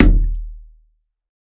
WATERKICK FOLEY - HARM LOW 06
Bass drum made of layering the sound of finger-punching the water in bathtub and the wall of the bathtub, enhanced with lower tone harmonic sub-bass.
foley, bassdrum, percussion